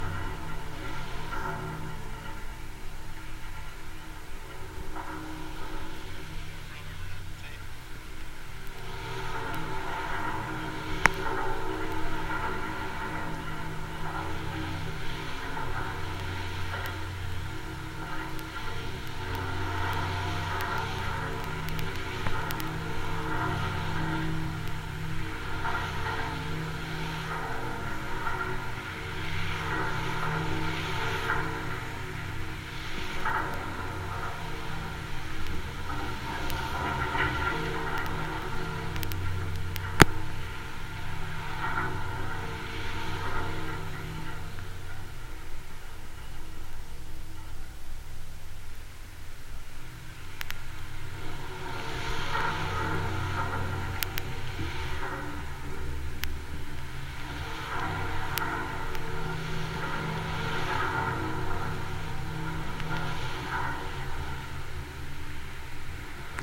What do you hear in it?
GGB suspender SE63SW
Contact mic recording of the Golden Gate Bridge in San Francisco, CA, USA at southeast suspender cluster #63. Recorded December 18, 2008 using a Sony PCM-D50 recorder with hand-held Fishman V100 piezo pickup and violin bridge.
bridge, cable, contact, contact-microphone, field-recording, Fishman, Golden-Gate-Bridge, piezo, sample, sony-pcm-d50, V100, wikiGong